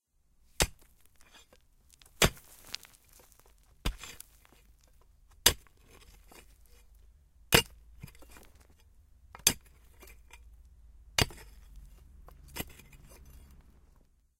Digging with pick axe
Digging earth with a pick
digging, earth, pick-axe